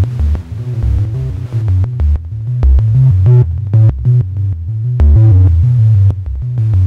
Micron Flute 3
Alesis Micron Stuff, The Hi Tones are Kewl.
ambient, bass, chords, electro, leftfield